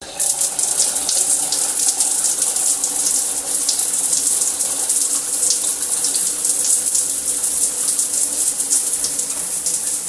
A sound effect of a shower running loudly